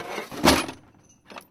Tool-case - Drawer open slowly
A drawer pulled open slowly.